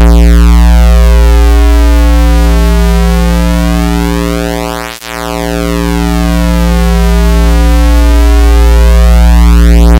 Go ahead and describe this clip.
squaresweep4-labchirp
Both operators were set to "Square". This sound is mostly common in soundfonts as the "square lead" preset, and has this electrical sci-fi esque mystical sound in the background as a depth effect. As with the other sweep sounds from me created using LabChirp, this sound consists of two operators at either the same or different frequencies. Operator 1 is always set to 57.7 in frequency, while Operator 2 is set to 57.8.
Created using LabChirp, a program that simulates a 6-operator additive synthesis technology.
8-bit, ambeint, ambient, digital, drone, duty, duty-sweep, electronic, experiment, experimental, game, LabChirp, laboratory, loop, loopable, modulation, noise, PWM, robot, sci-fi, sound-design, sweep, sweeping, video, video-game, videogame